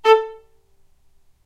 violin spiccato A3
spiccato, violin